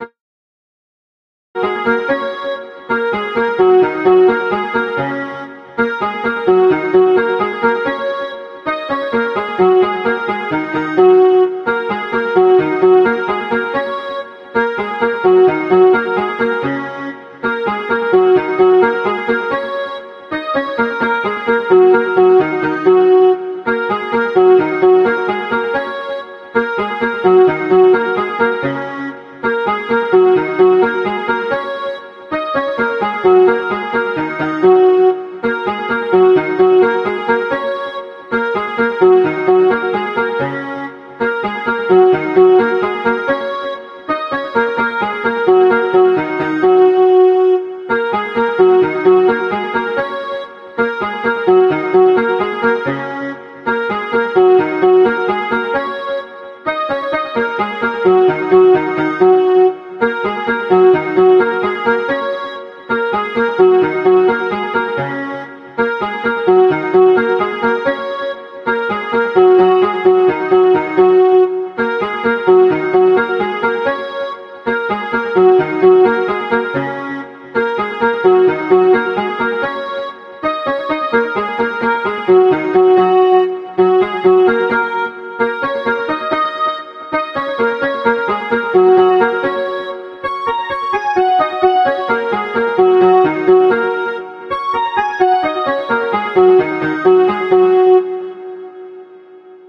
This is a techno-esc sample I created using FL Studio 12.